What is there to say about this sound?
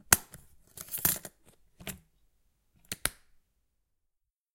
The sound of an folder.
case, file, folders, paper, folder, office, binder